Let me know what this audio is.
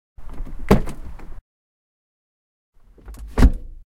Car Door open and close
opening and closing car door